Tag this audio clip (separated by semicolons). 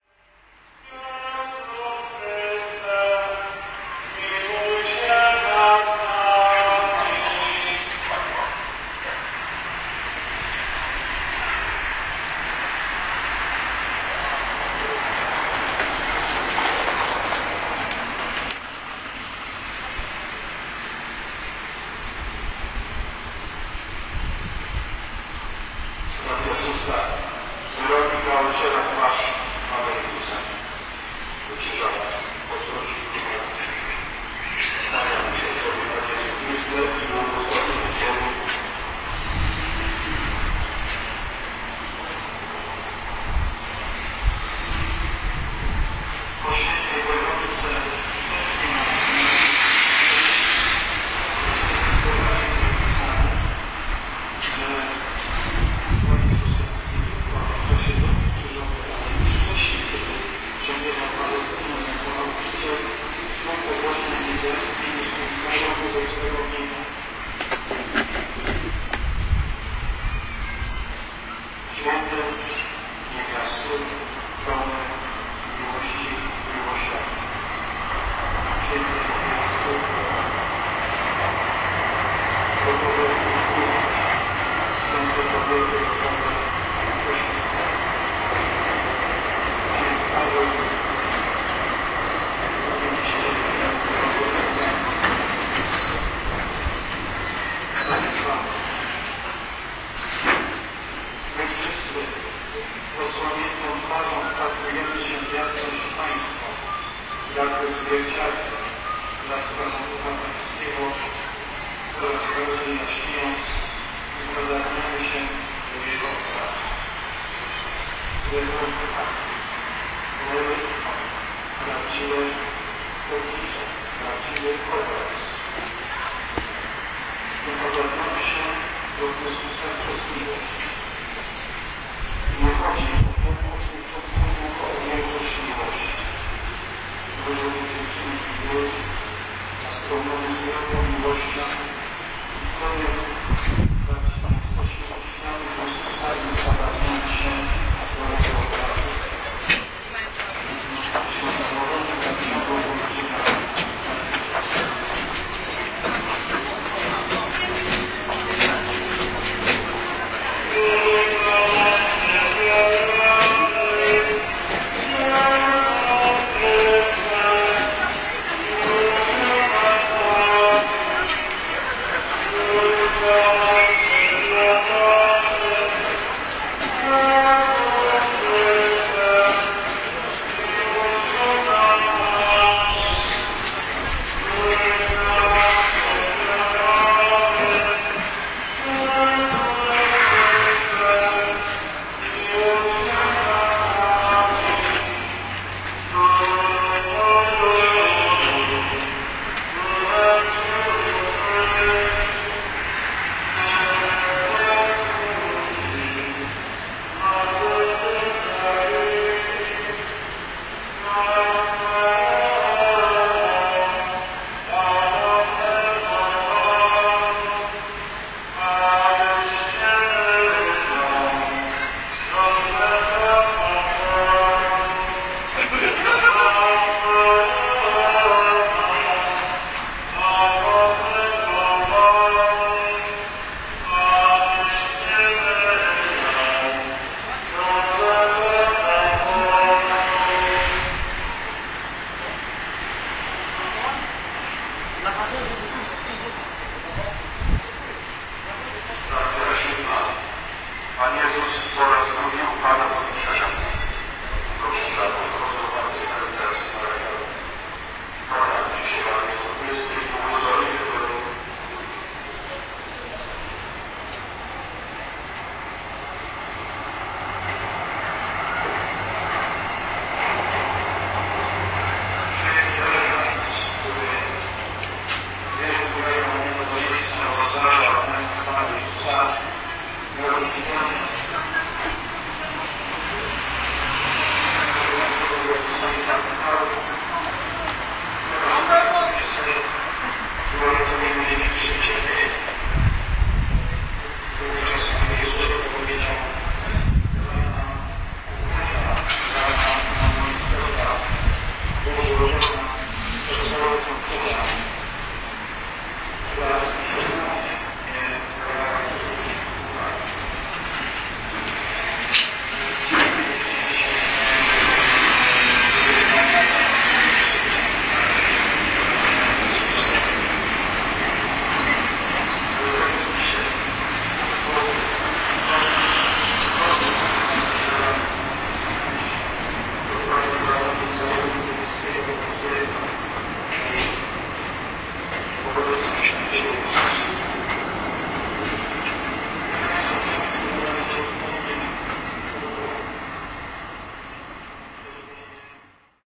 wilda; easter; people; way-of-the-cross; singing; poland; catholic; religious; procession; street; poznan